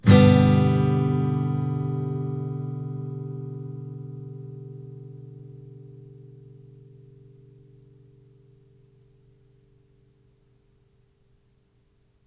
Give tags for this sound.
acoustic
chord
guitar
strummed

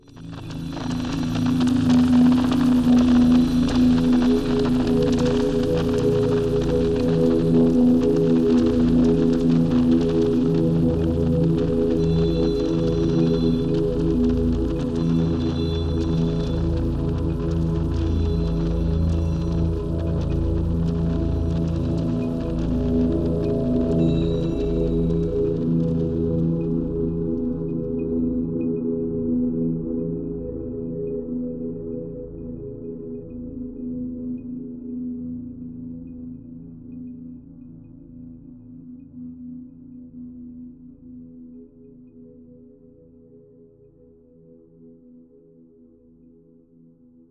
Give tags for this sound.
multisample
pad
synth
granular
ambient
texture
digital
space

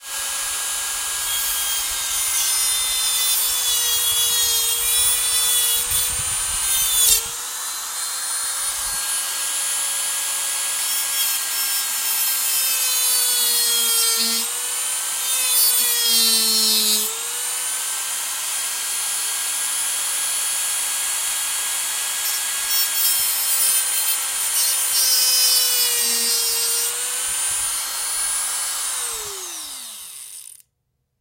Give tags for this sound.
dremel
drill
electric
foley
industrial
motor
multi
power
tool

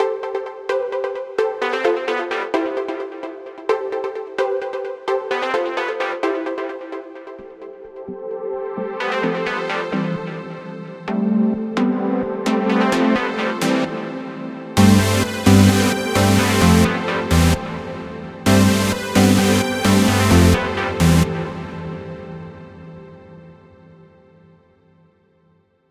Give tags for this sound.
dance
house
rave
trance

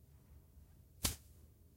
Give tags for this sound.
crunchy
landing
grass
telescope

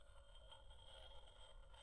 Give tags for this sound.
metal
edge
interaction
scratch

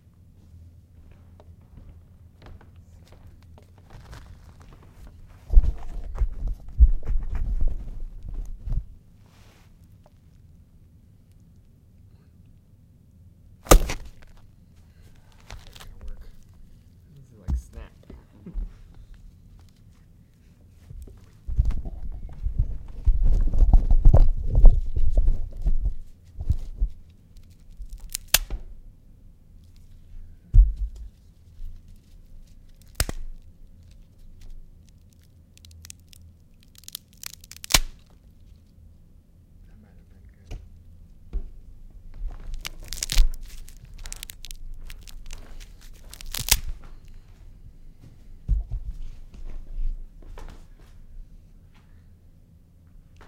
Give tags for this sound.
break
breaking
crack
twigs
Wood

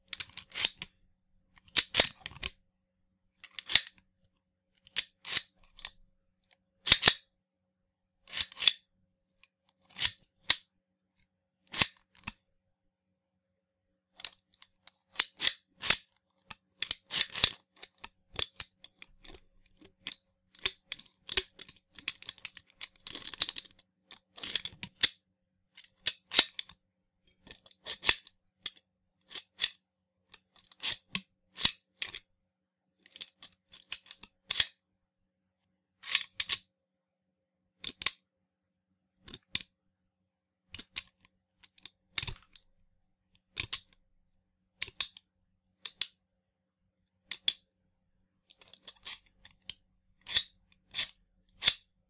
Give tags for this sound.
checking
firearm
gun
reloading
shell
weapon

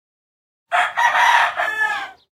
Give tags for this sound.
Wuadley
felix
Mexico
cock
crow
project

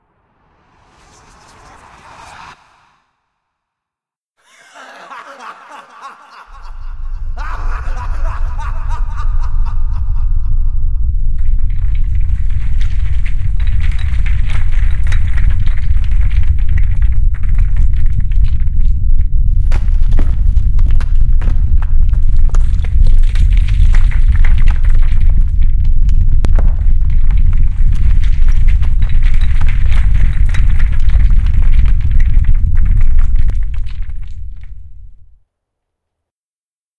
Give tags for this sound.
Collapse
Deep
Dungeon
evil
Horror
Laugh
Monster
Scary
Voice

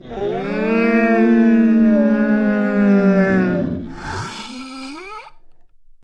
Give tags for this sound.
creepy
beast
terror
growl
monsters
Monster
spooky
scream
wheezing
sfx
roar
horror
wail
scary
haunted

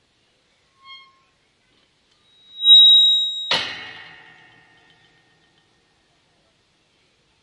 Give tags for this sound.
Creaking
Graveyard

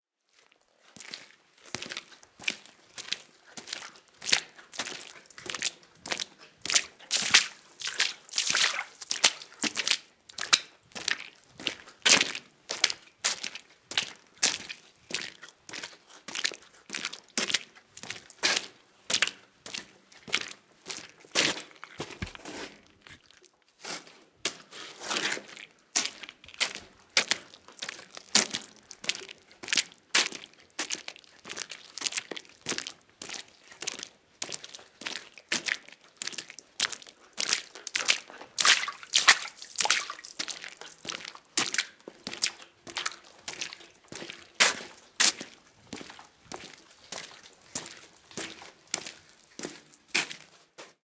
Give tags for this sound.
person
walking
water

